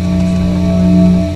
a recording between the notes of a live performance from around '97, sampled and looped with a k2000.
tone, wave, lofi, hum, lo-fi, texture, acoustic, warm